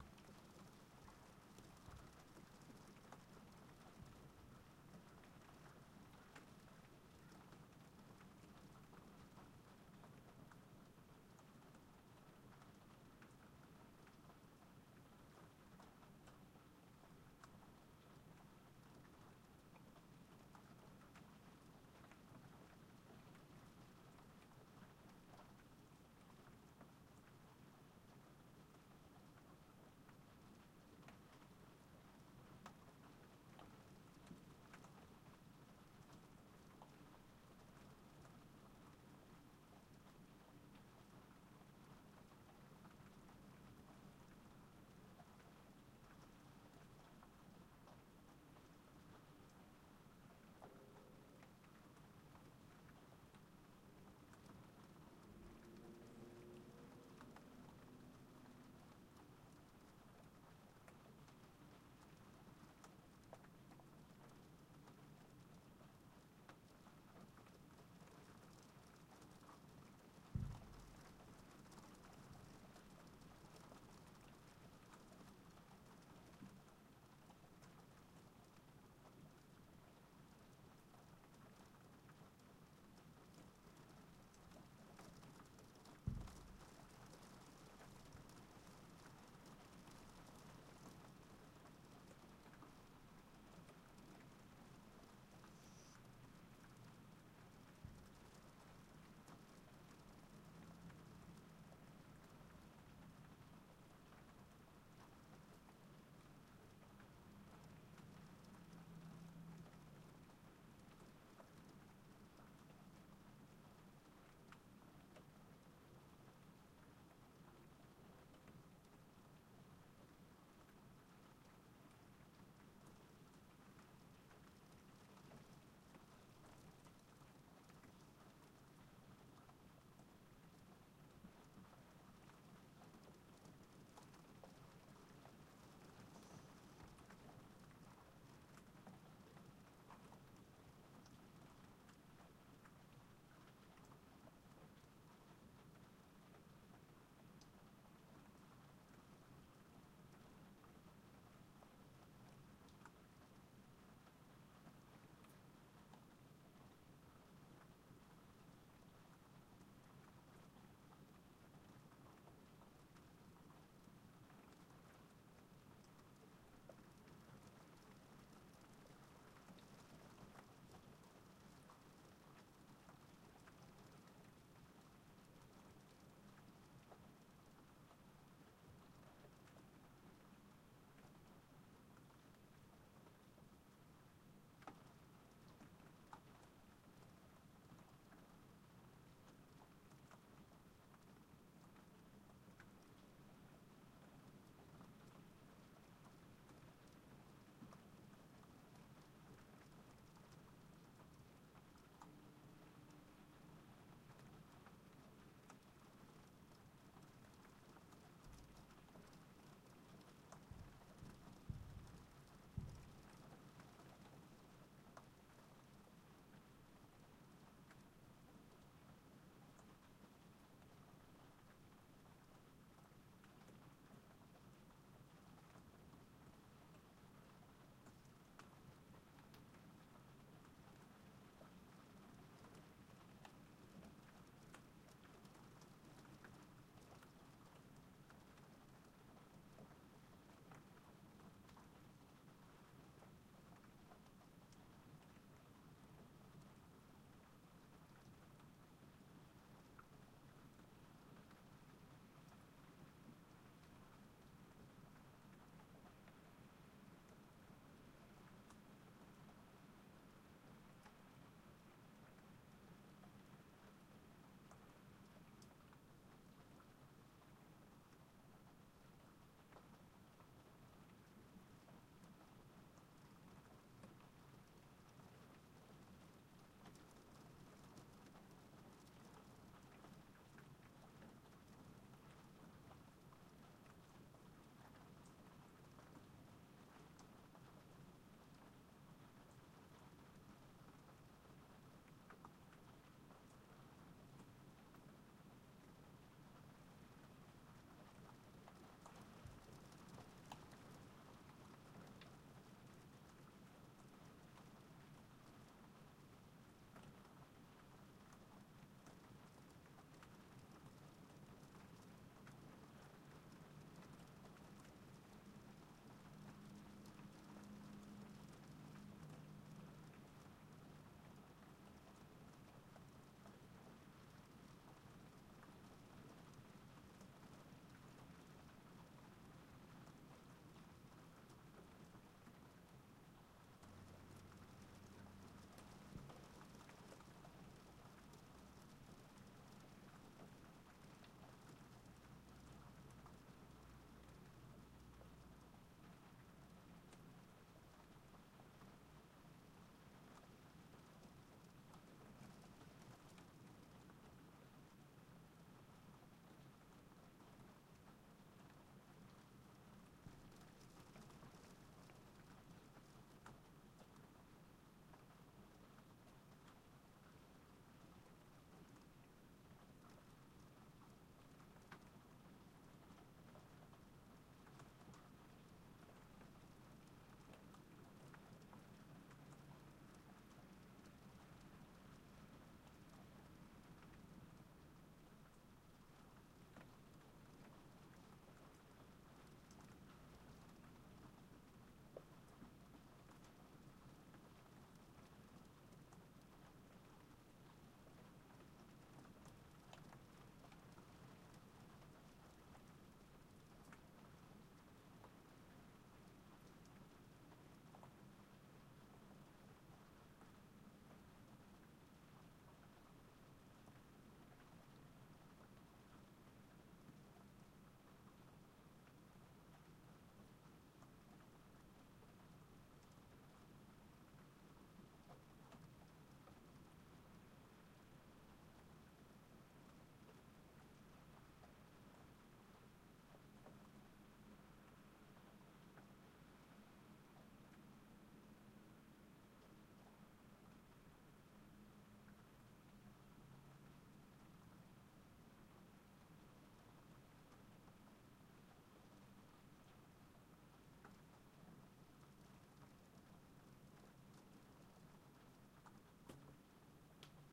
steady rain room window indoor
Recorded with the H4N Pro Handy Recorder placed on my window sill with windows closed. March steady rain with occasional distant traffic sounds and miscellaneous sounds from the old condo. Slight EQ to create a more ambient-like mood.
ambient, steady-rain, rain, indoor